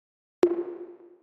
Bop Button
bop, button, interface